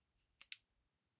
Gun de-cocking
De-cocking a cocked revolver. recorded with a Roland R-05
uncock, gun, revolver, decock, decocking